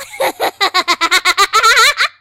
another manic laugh for minkie pie
laugh, manic, minkie, obsidian, pie